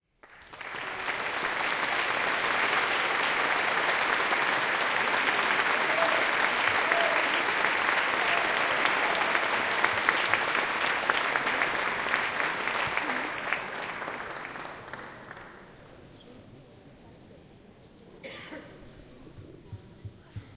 applause int large crowd church2
large, church, crowd, int